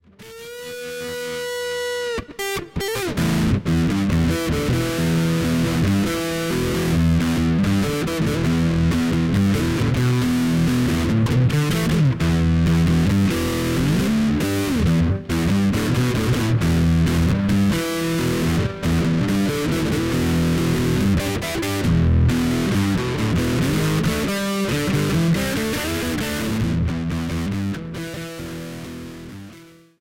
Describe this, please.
20070128.bronca.rif
electric guitar with lots of distorsion. Not my favourite sound but hey.